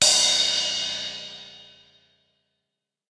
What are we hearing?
Drum kit sampled direct to my old 486DX no processing unless labeled. I forget the brand name of kit and what mic i used.